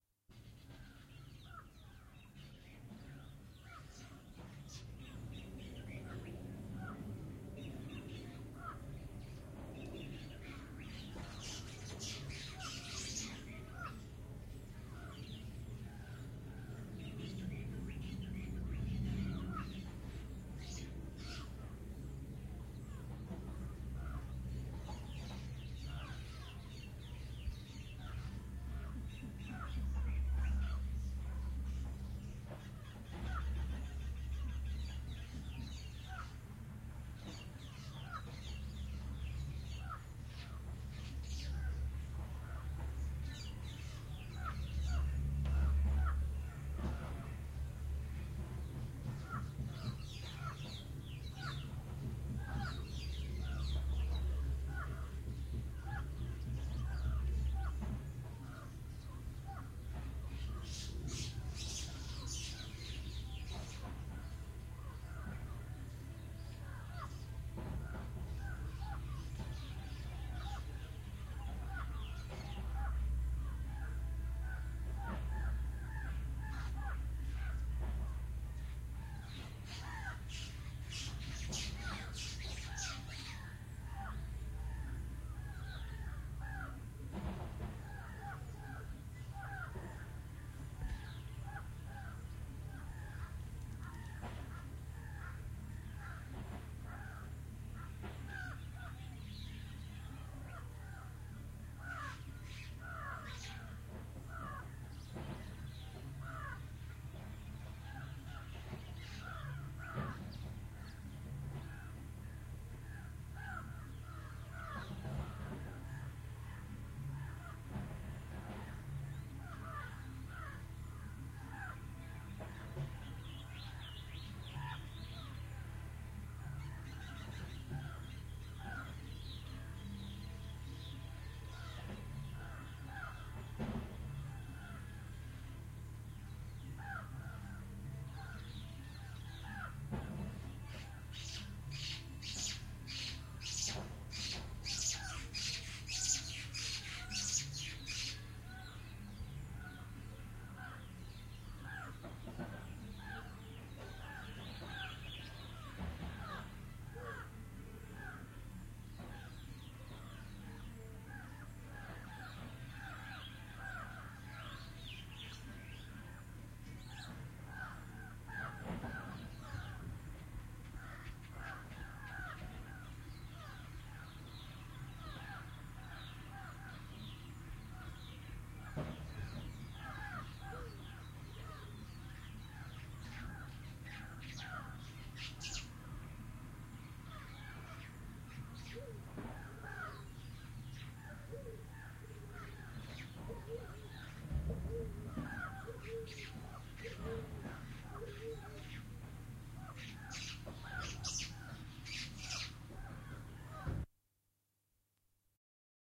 Lokichokio basecamp for SouthSudan
Recorded on Sony MD. Lokichokio Former UN basecamp in Kenya. Birds singing
ambient, birds, field-recording, Kenya, nature, singing, South, Sudan